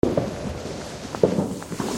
firework background 02
Ambient firework sounds